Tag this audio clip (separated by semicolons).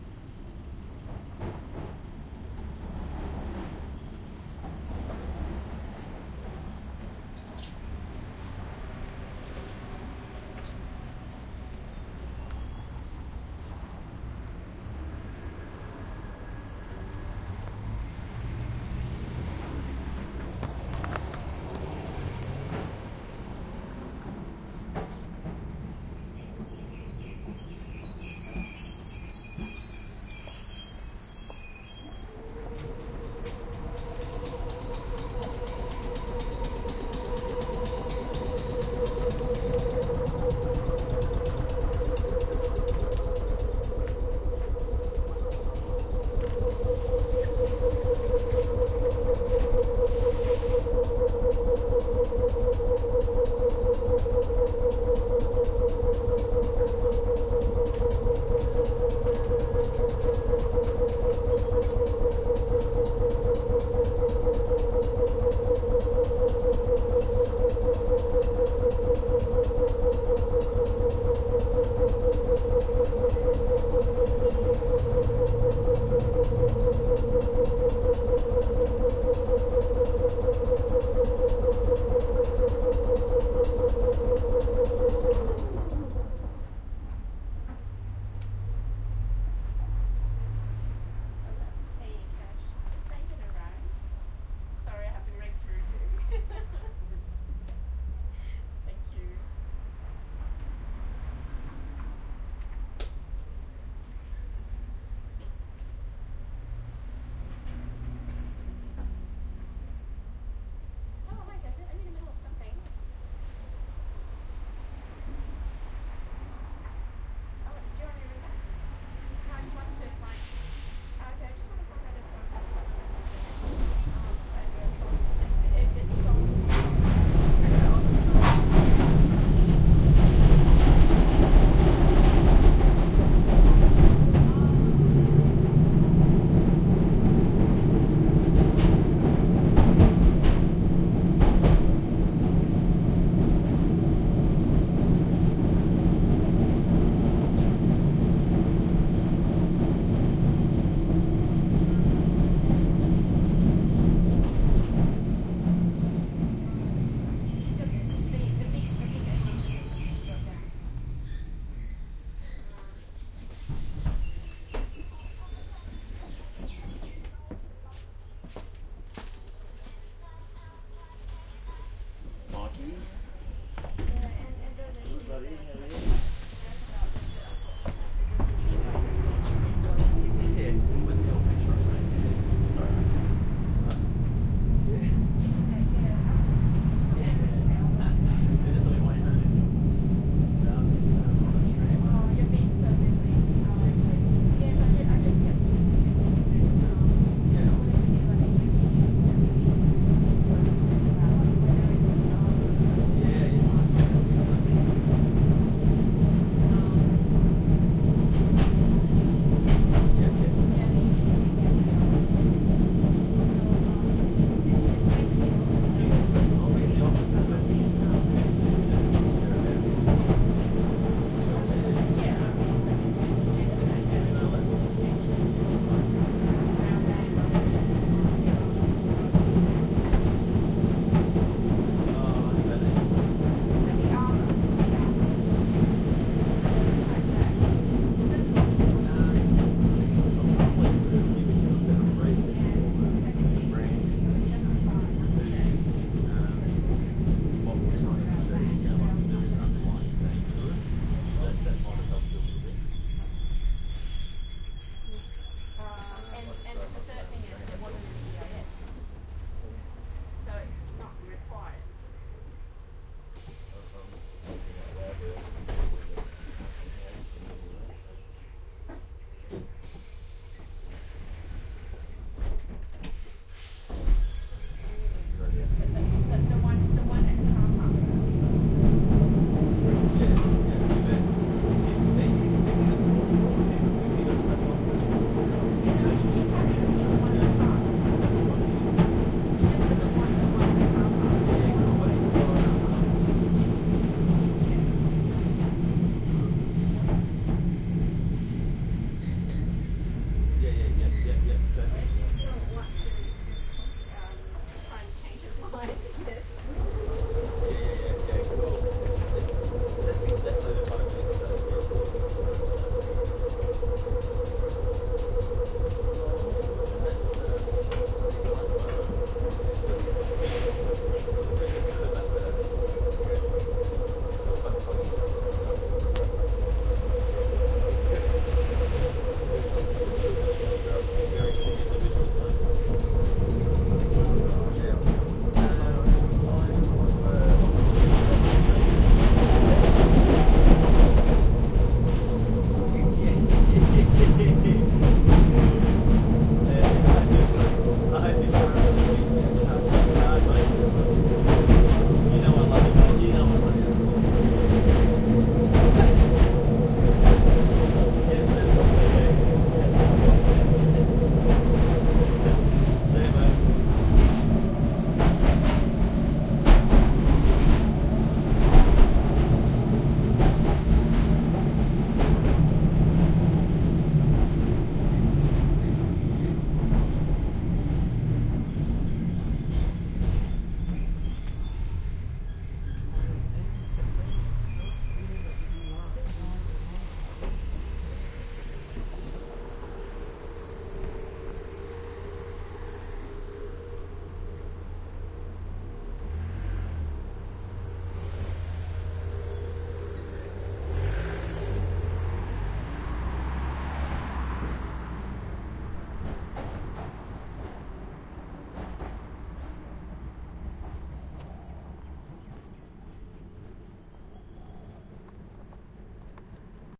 class; australia; melbourne; w; tram